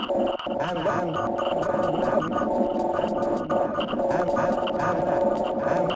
Bed of shuffling static with pulses of throaty mid-lo bass purr inter spaced with male vocal fragment saying "and"
FX: